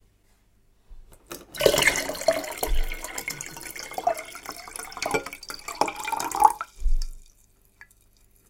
pouring water (short) 02
Bottle, Glass, Liquid, Water